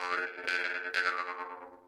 Vargan NT loop 003
harp, jaw, khomus, vargan